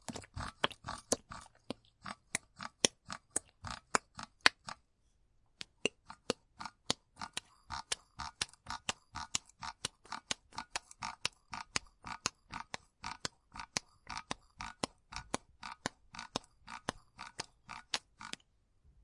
Hand pump oil can squirt 02
Recorded on a Zoom H1n recorder. I squeeze the handle on the oil can and it sputtered oil out.
Lubricants, Metal, Grease, Oiler, Spout, Gun